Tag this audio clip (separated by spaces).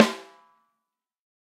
13x3 drum fuzzy multi sample shure sm7b snare tama velocity